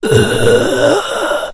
A low pitched guttural voice sound to be used in horror games, and of course zombie shooters. Useful for a making the army of the undead really scary.
Voice, indiedev, Growl, games, horror, Voices, arcade, Undead, Monster, Talk, gamedeveloping, videogame, Ghoul, game, Lich, videogames, Vocal, gamedev, Evil, Zombie, indiegamedev, sfx, Speak, gaming